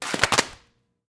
Request for a splashy smashing splat sound. Wet towels and rubber gloves tossed in the air and landing on a concrete floor.
Composite of three different takes
Recorded with AKG condenser microphone M-Audio Delta AP